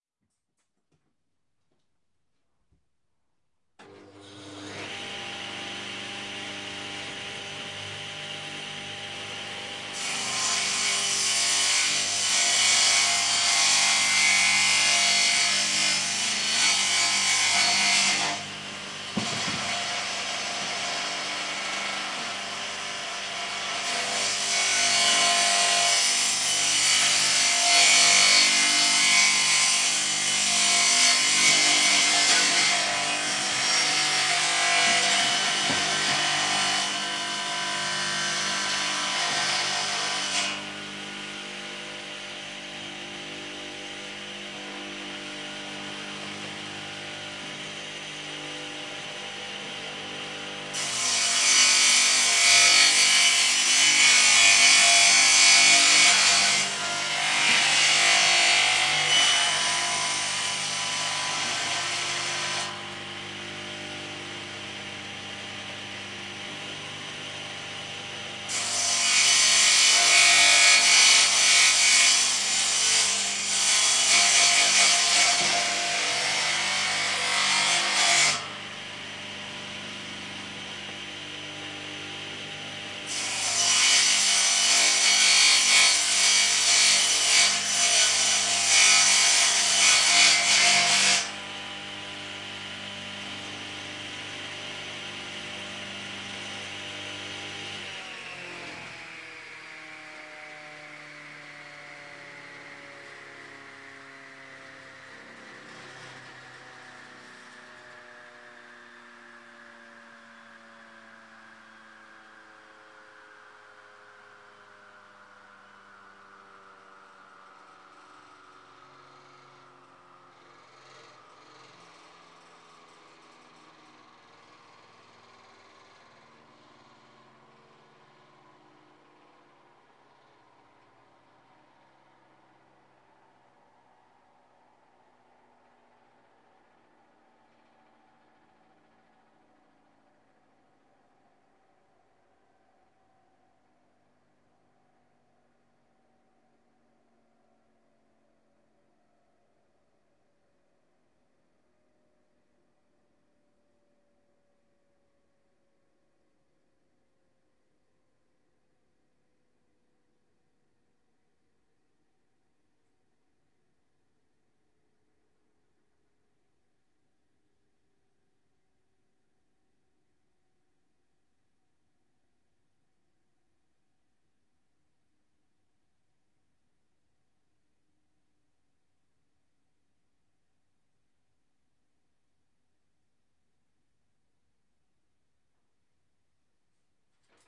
Bench Saw Serial Ripping
A stereo field recording of a 2 hp circular bench site (portable)saw ripping softwood. Rode NT4>Fel battery preamp>Zoom H2 line in